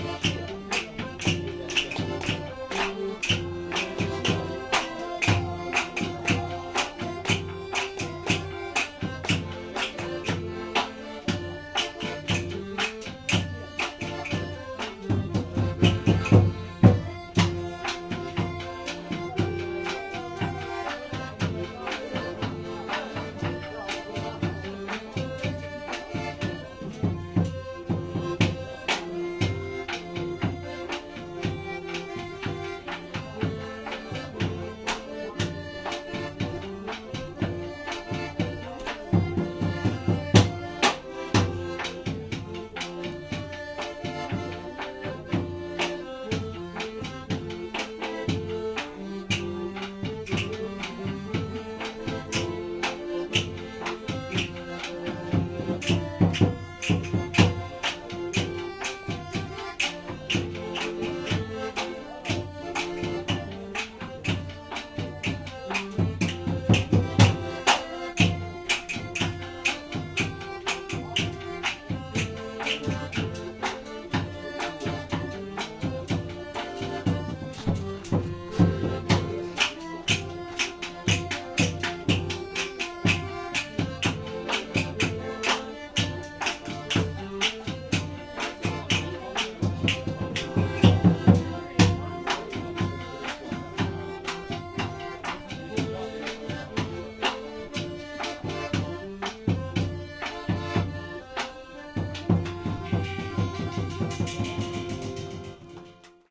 BR 031 VN ethnic
North Vietnamese ethnic music.
Villagers perform north Vietnamese ethnic music, songs and dances.
Recorded in September 2008, with a Boss Micro BR.
ambience, music, people, dance, village, ethnic, Field-recording, North-Vietnam, instruments, drums, Vietnam